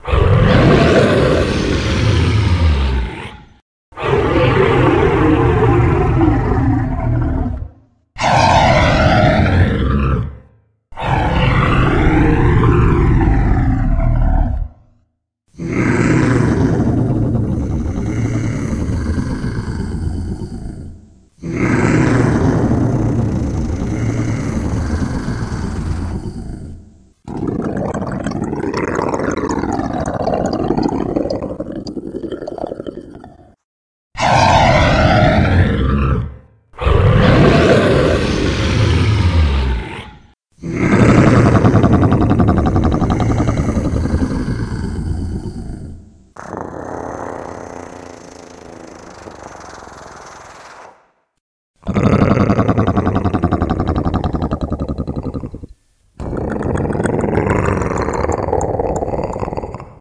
Dragon: Several roars, growls and snarls
Several growls, snarls and roars I created for a dragon character.
beast, hiss, dinosaur, SFX, snarling, snarl, roaring, growl, attack, roar, Sound, dragon, monster, hissing, creature, effect